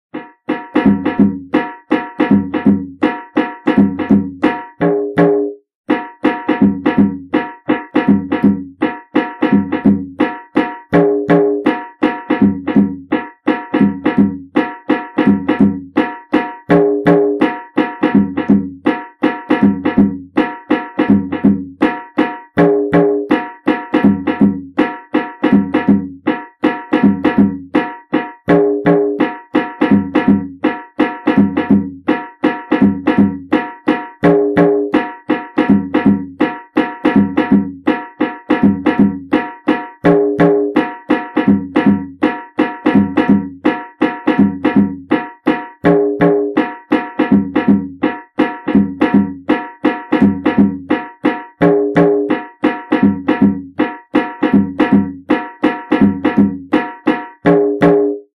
Ethnic Drum Loop - 6
Ethnic hand drum loop.